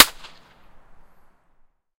Concrete Corner Outside 4
This is a free recording of a concrete/stone corner outside of masmo subway station :)
Masmo, Outside, Corner, Concrete